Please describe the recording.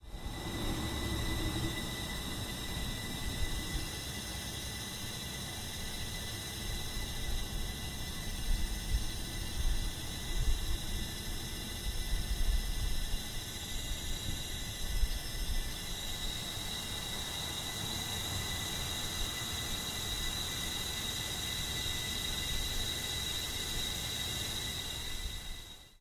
Here is a gas meter on a restaurant. I Managed to get a chunk without much background noise here but I do change the mic position part way through the recording..you'll notice it gets darker, then change it back. There's enough of each to get a good loop going as I have in the past. Recorded with a Zoom h4.
room-tone, hiss, drone, gas-meter, cyclical